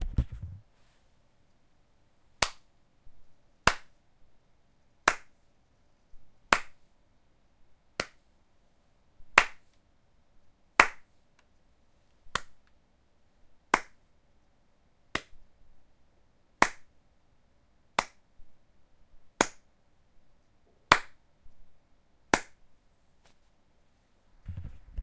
one person clapping (me), relatively slowly. I think I recorded this with my Zoom H4
clapping, hands